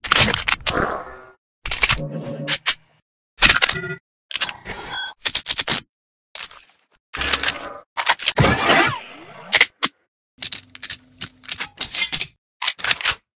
Scifi Weapon 1
army, military, reload, weapon